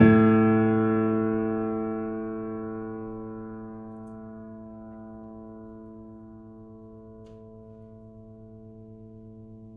My childhood piano, an old German upright. Recorded using a Studio Projects B3 condenser mic through a Presonus TubePre into an Akai MPC1000. Mic'd from the top with the lid up, closer to the bass end. The piano is old and slightly out of tune, with a crack in the soundboard. The only processing was with AnalogX AutoTune to tune the samples, which did a very good job. Sampled 3 notes per octave so each sample only needs to be tuned + or - a semitone to span the whole range.
It is a dark and moody sounding, a lot of character but in now way "pristine".